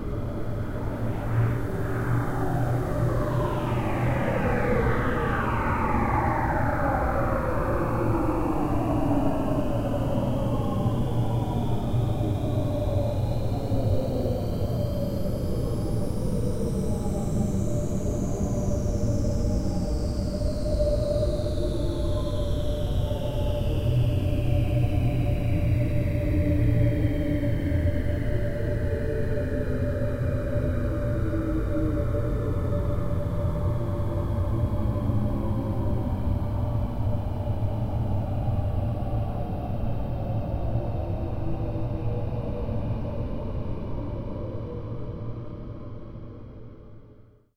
Some future city, late at night, neon, distant sounds of transport. Perhaps.